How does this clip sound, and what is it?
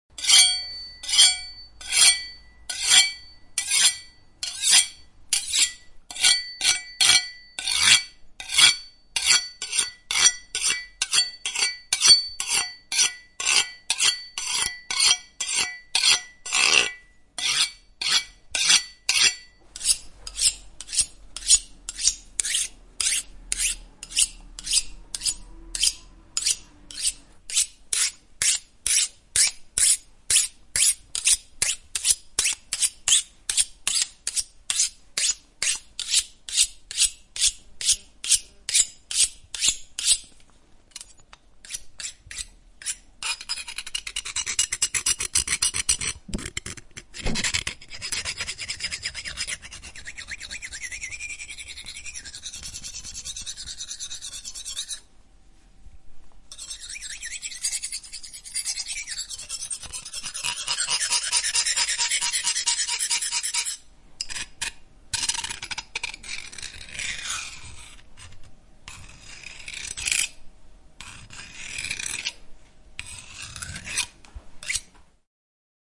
sharpening knife
blade, knife, sharpening